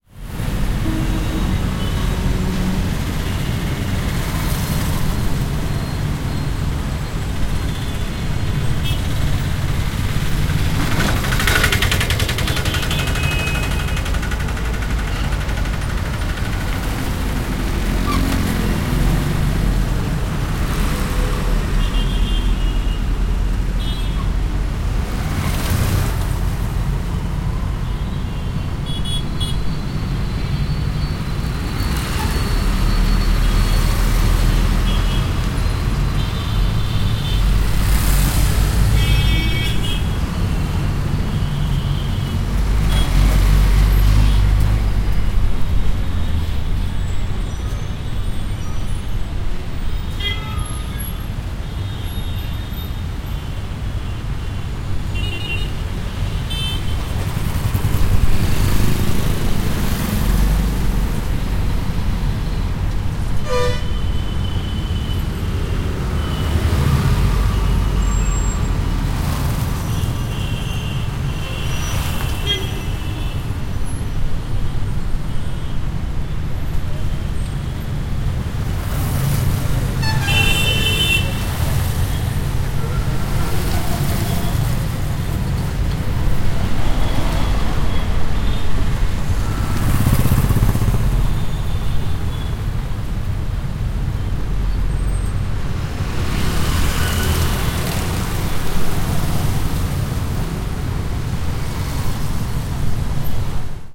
Chennai (India) Traffic Ambience 01
I've recorded this Street Ambience with a Zoom H1 Audiorecorder, edited in Adobe Audition. The recording contains the ambience of a very busy street in Chennai, Tamil Nadu, India. You'll hear many mopeds, trucks, cars, tuktuks and of course people passing by.
ambience, cars, city, crowd, field-recording, highway, horns, india, indian, madras, mumbai, noise, roads, soundscape, street, streetnoise, tamilnadu, traffic, trucks, tuktuk, vehicles, zoomh1